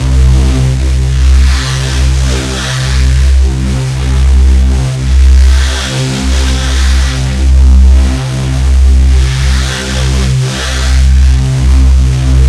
ABRSV RCS 005
Driven reece bass, recorded in C, cycled (with loop points)
bass, harsh, drum-n-bass, heavy, reece